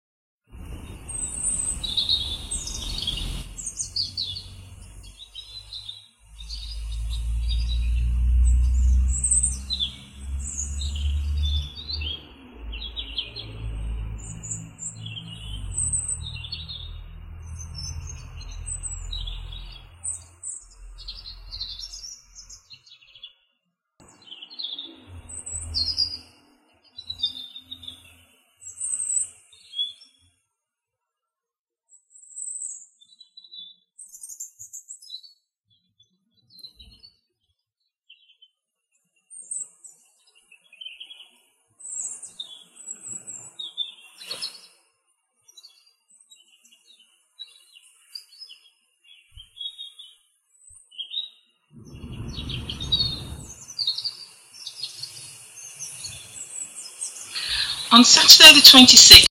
Robin singing, so nice. Had to remove a lot of annoying traffic noise so hopefully the recording is ok.